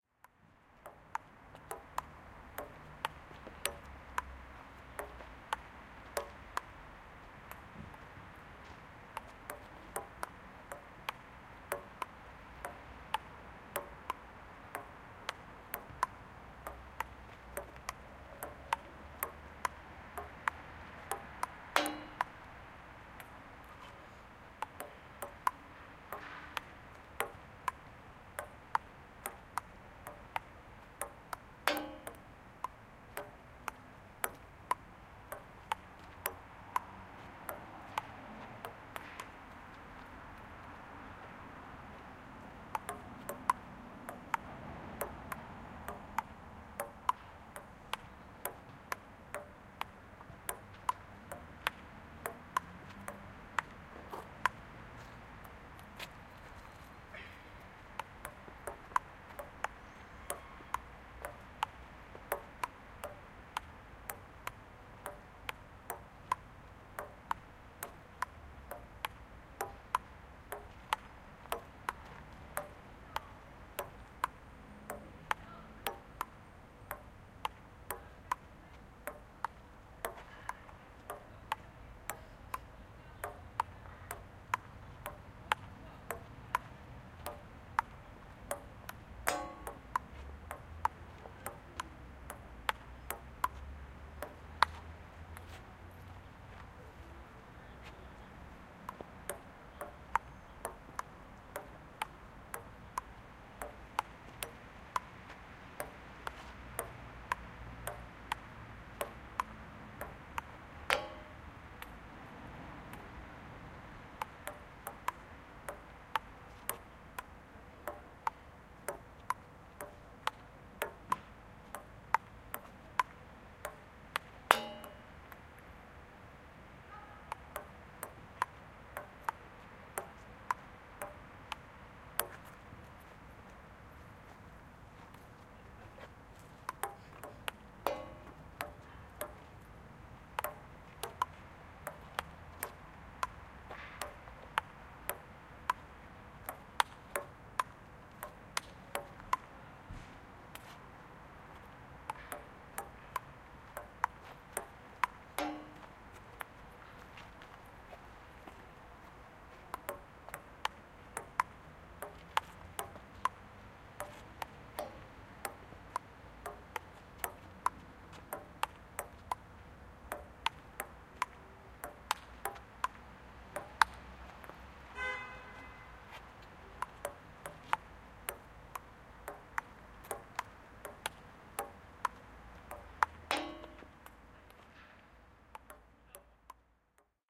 hi-fi szczepin 31082013 ping-pong on sokolnicza street
31.08.2013: Sound of ping-pong game on concrete table. Sokolnicza street in Wroclaw (Poland).
marantz pdm661mkII + shure vp88
field-recording, Poland, ping-pong, Wroclaw